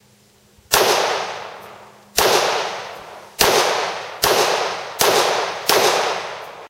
kimber 1911 shooting
This is the sound of me shooting a Kimber 1911 .45 pistol